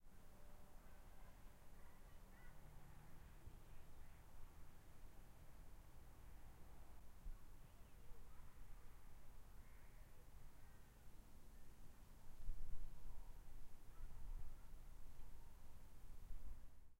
High Altitude Ambience (mixed sample)
Recorded at Cirsten rock, National Park Germany. This is an edited sample of the recording. Namely, I removed a noisy part and united two different parts of the recording. It's interesting at some point how the wind flows gently and playfully at this magnificent high altitude spot.